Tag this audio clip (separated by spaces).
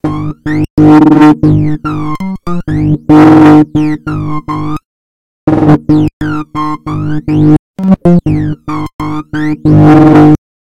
electric piano 60bpm electronic slow-release metallic